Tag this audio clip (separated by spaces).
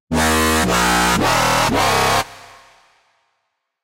bass dnb drumandbass drumstep dubstep